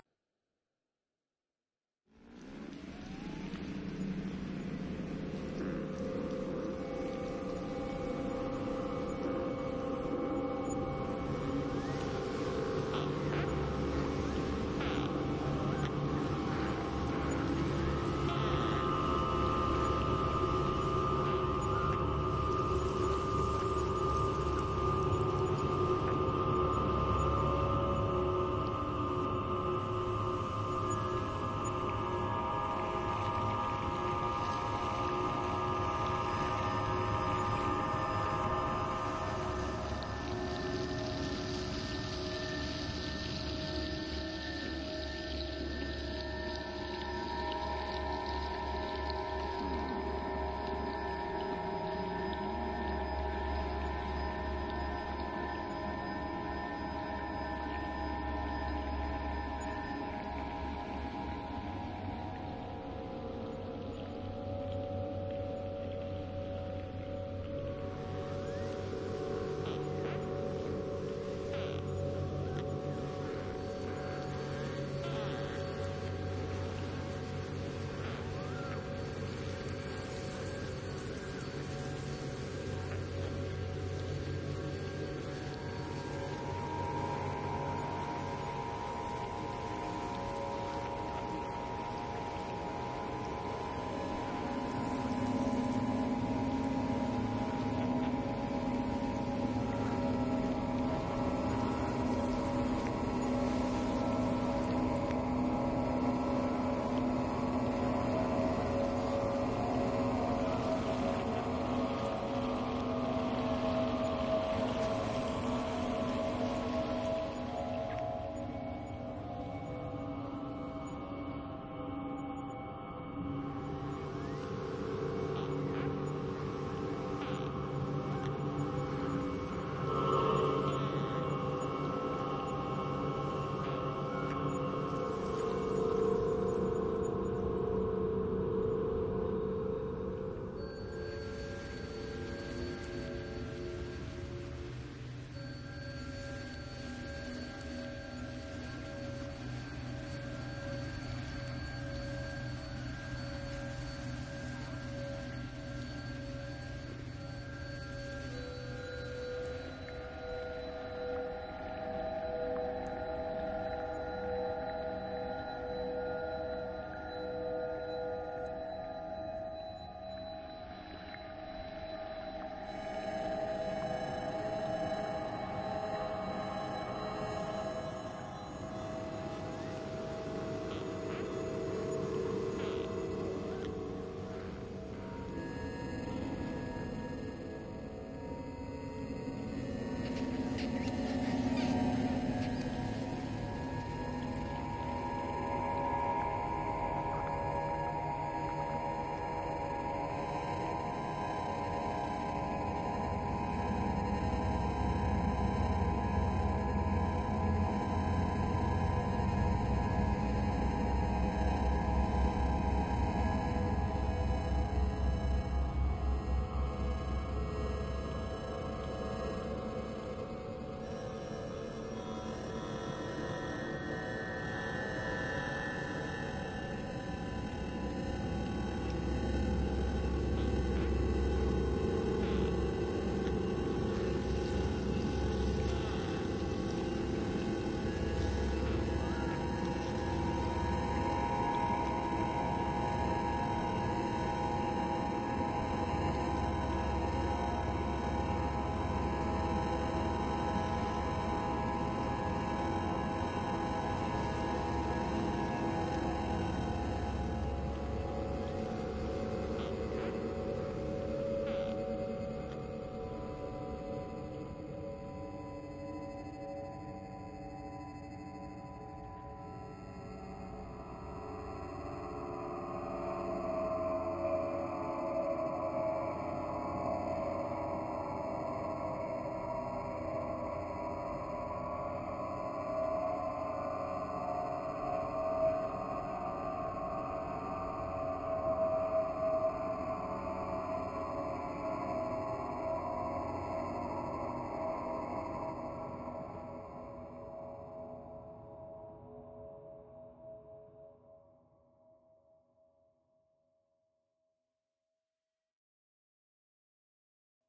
An undulating soundscape with rhythmic creaks and subdued metallic resonance, derived from manipulated field recordings and bell sounds.